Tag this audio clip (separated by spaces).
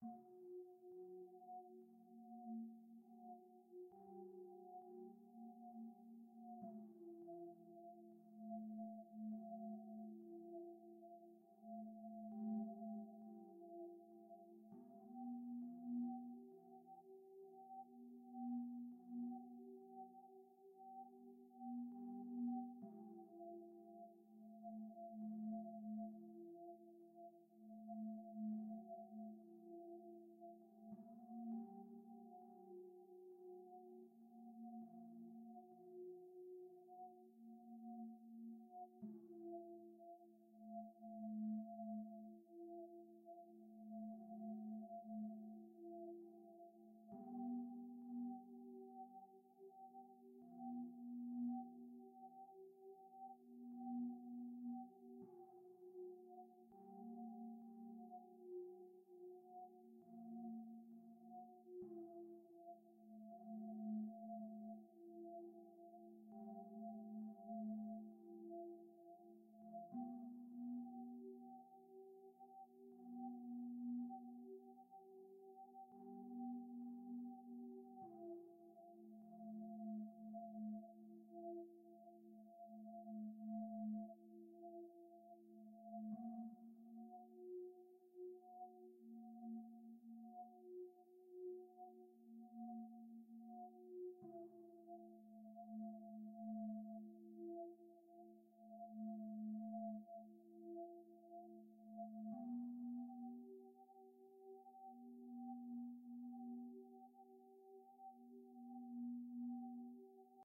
synth electronic soundscape ambience dark atmosphere music sci-fi processed ambient